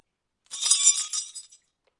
A sound of chains clanking.
Recorded on an MXL 990 and pitch-shifted and equalized in Logic Pro X
keys, metal-on-metal